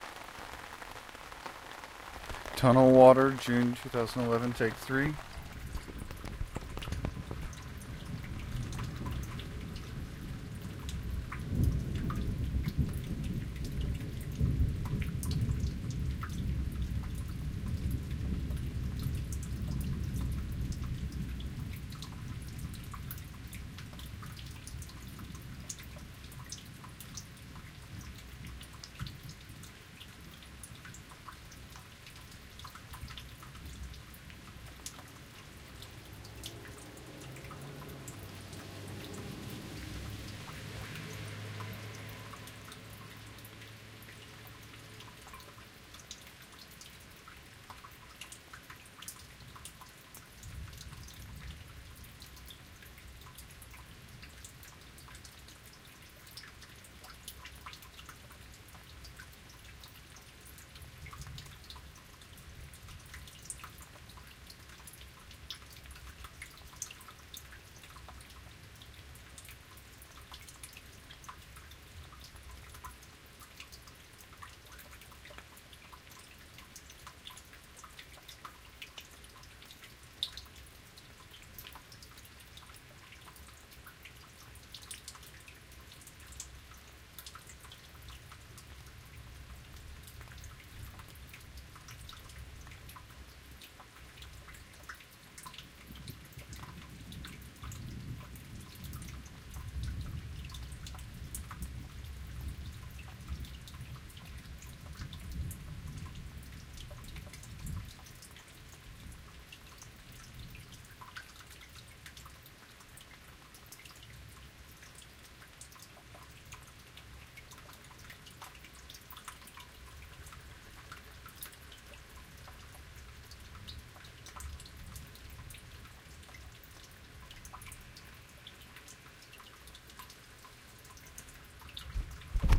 rain driveway tunnel drip 03
Water running through a concrete tube underneath my driveway, includes thunder and rain.
rain, stereo, thunder, tunnel, water